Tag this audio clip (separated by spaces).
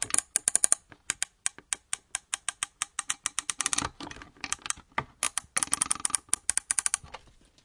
brush random scrapes hits thumps objects variable taps